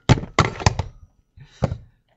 a roll of tape falling on an carpet ground, taken with AKGc4000b